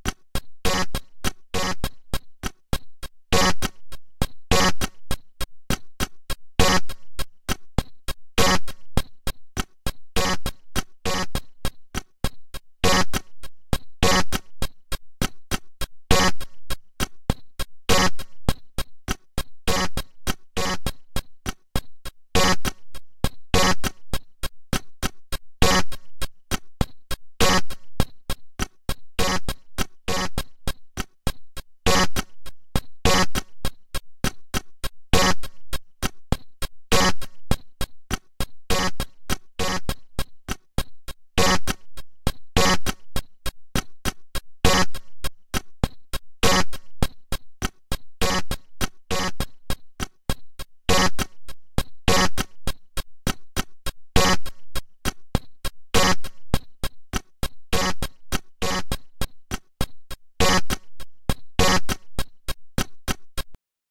The sound chip of the HR 16 has a LOT of pins. A ribbon cable out to a connection box allows an enormous number of amazing possibilities. These sounds are all coming directly out of the Alesis, with no processing. I made 20 of these using pattern 13, a pattern I'd programmed a long time ago. But I could have made 200.. there's so many permutations.